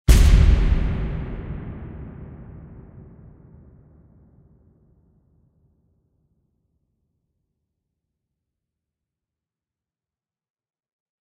Boom + Reverb
A full bodied explosive boom with a long tail out reverb.
boom, explosion, hit, reverb